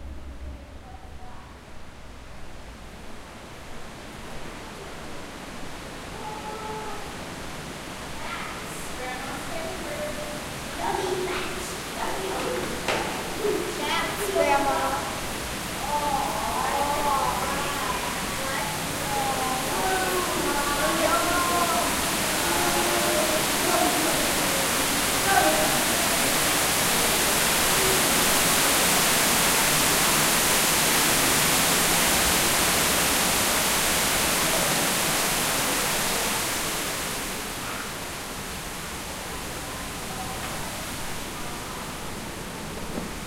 Knigdoms of the Night (A Virtual Walk-Through of the Caves)
A virtual walk-through of the cave exhibit in the Kingdoms of the Night exhibit.
kingdoms, a-virtual-walk-through-of-the-caves, caves, virtual, nature, a, henry, doorly, wildlife, through, henry-doorly, walk, field-recording, kingdoms-of-the-night